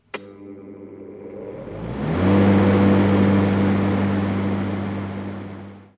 electric lawn-mower startup
An electric lawnmower starting up
lawnmower, mower, start, startup